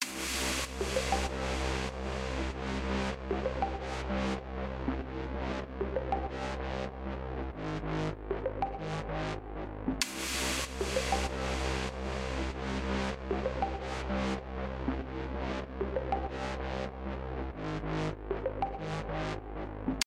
Downtempo made with Magix Music Maker
background, gaming, music, podcast